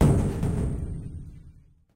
Football on a big plate.
Record with a Zoom H1. Throw a ball on my platereverb. Edited in Sound Forge,
weird, echo